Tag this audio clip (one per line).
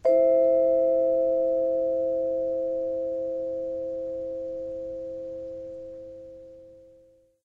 chord
percussion
vibraphone